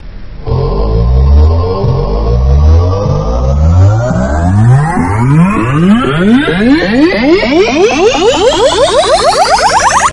A nice alert tone